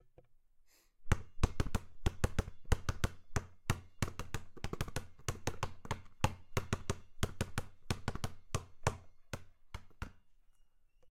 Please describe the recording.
The sound of my dribbling a basketball to a beat.